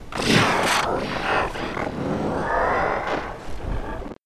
ZERILLO Alexandre 2015 2016 SatanWannaPlay
Used the same track 5 times and applying different modifications to each of them and synched the whole. I added up the pitch modifications (high-pitching and low-pithing in a various order, applying different values for each of them.
Typologie selon Schaeffer :
Continue Complexe
Masse cannelé.
Timbre métallique, sec.
Grain rugueux.
Pas de vibrato.
Abrupte explosif puis graduellement plus doux.
Variation Serpentine.
Site
satanic, satan, frightful, macabre, weird, sinister, devil, demon, foul